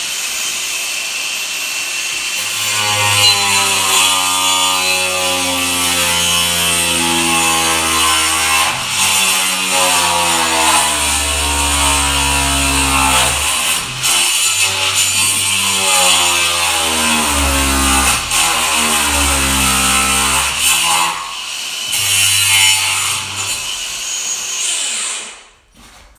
Grinder at work cutting wood